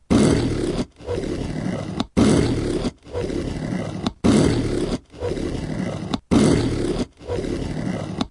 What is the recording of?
Repeated empty cafetiere plunging recorded onto HI-MD with an AT822 mic and lightly processed.
cafetiere, coffee, growl, household, kitchen, plunge